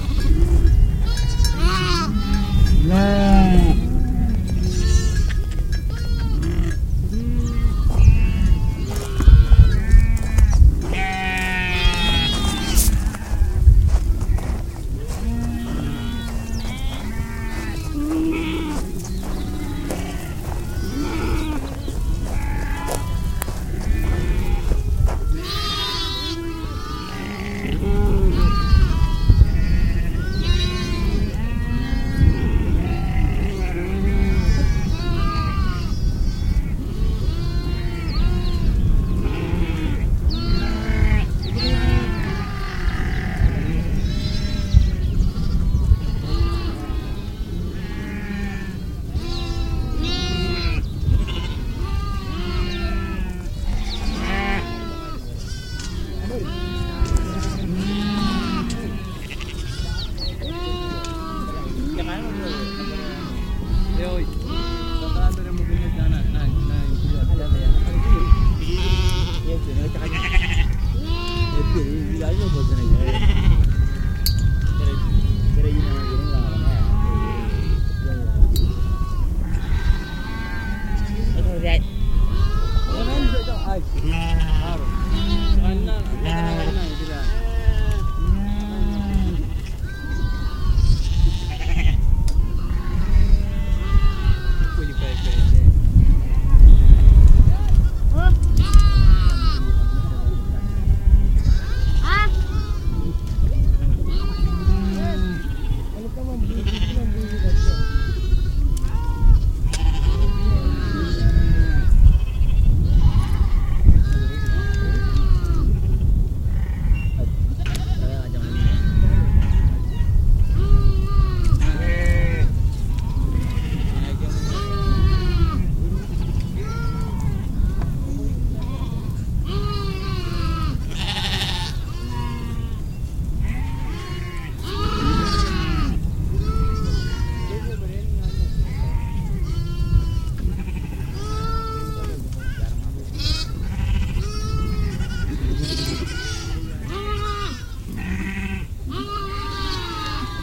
dec2016 goats Kenya Turkana Todonyang
recorded at Turkana Lake, Kenya, December 2016,
senheiser shotgun